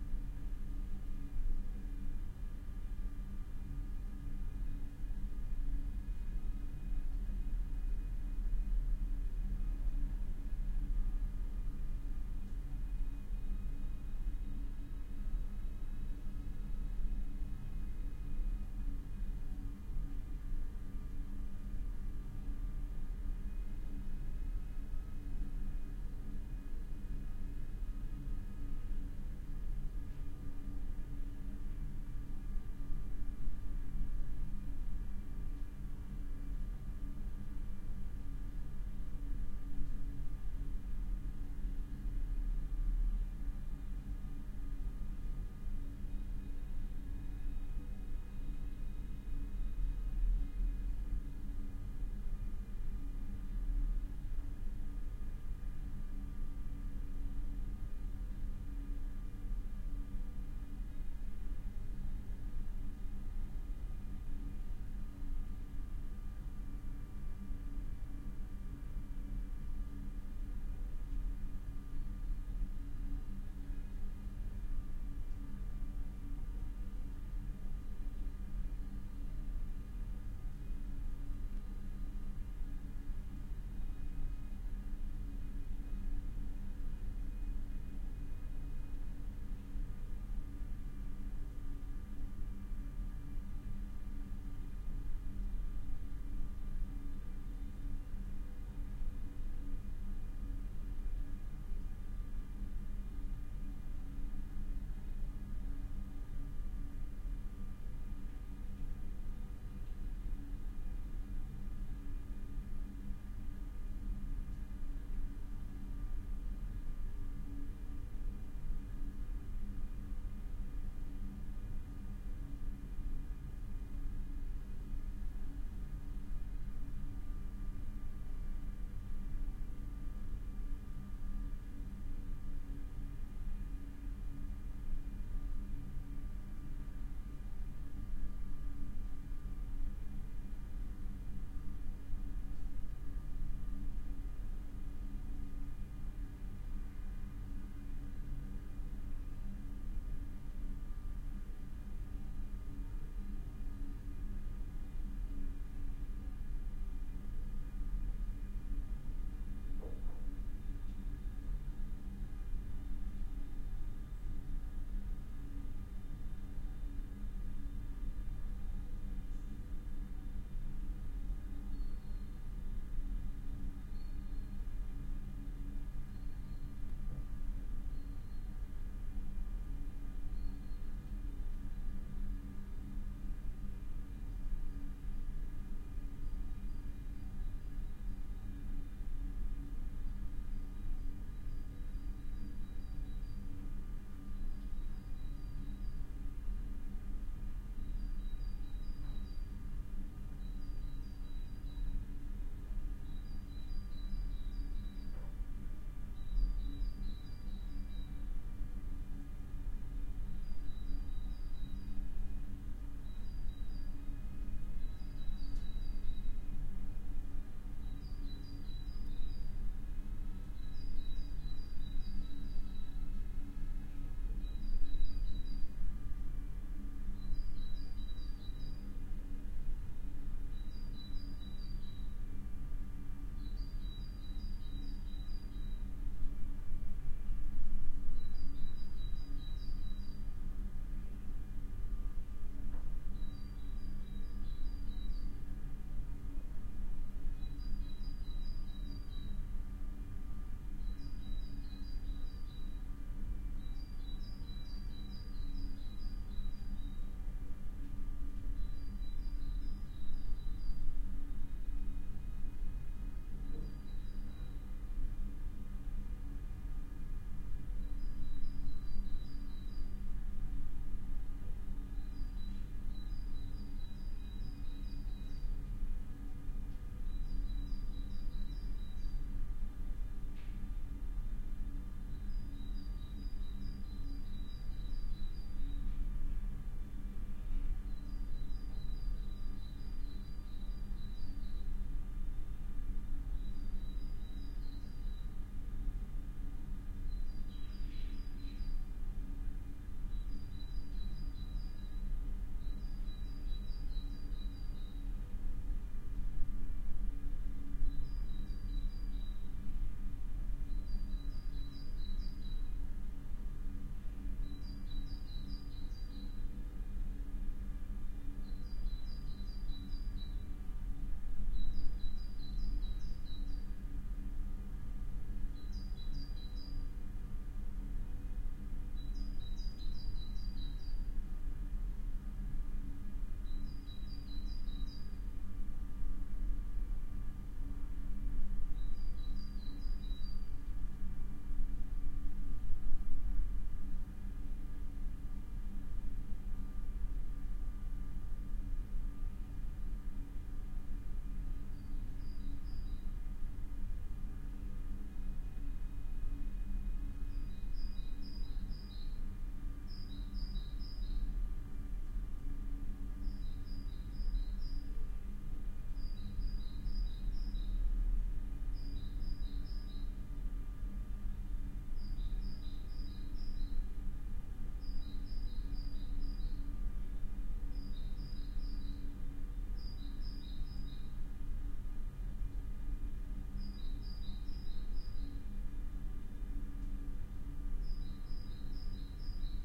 room-tone WC
ambience of a toilet-room.
MJ MK319-> ULN-2.
ambiance, ambience, ambient, atmos, atmosphere, background, background-noise, background-sound, general-noise, room, room-noise, room-tone, small-room, toilet, toilet-room, ventilation, WC